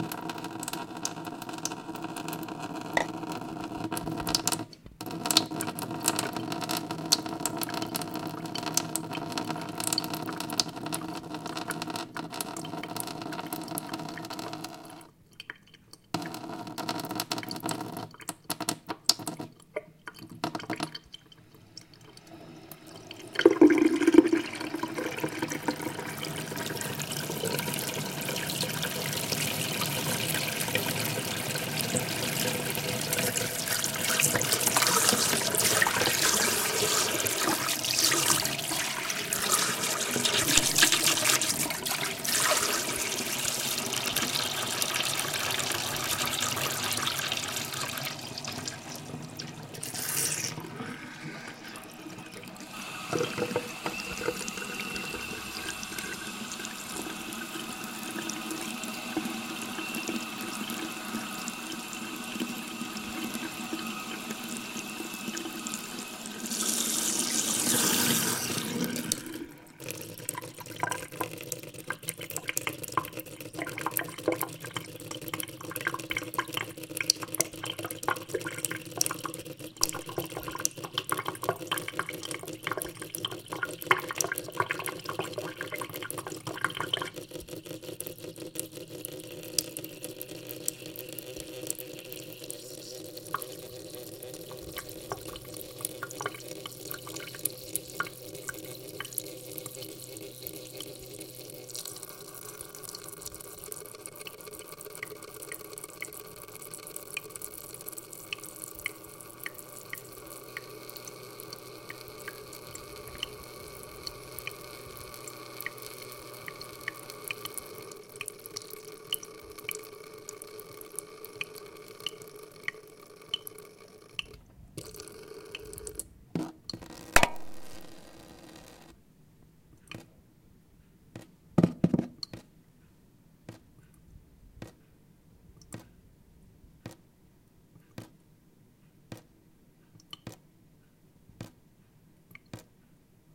Sink and Tap
Metal sink, tap and water dripping and streaming sounds.
Recorded with Sony TCD D10 PRO II & Sennheiser MD21U.
drip, dripping, drips, metal, metallic, sink, stream, tap, tapping, water, watery, wet